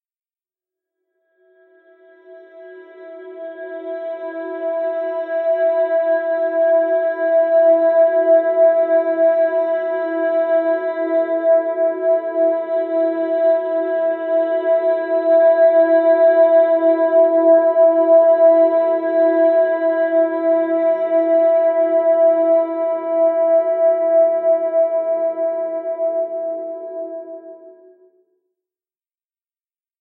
Pad 007 - Space Violin - E5
This sample is part of the “Pad 007 – Space Violin” sample pack. A violin or viola like sound, but yet quite different. The pack consists of a set of samples which form a multisample to load into your favorite sampler. The key of the sample is in the name of the sample. These Pad multisamples are long samples that can be used without using any looping. They are in fact playable melodic drones. They were created using several audio processing techniques on diverse synth sounds: pitch shifting & bending, delays, reverbs and especially convolution.
ambient, atmosphere, drone, multisample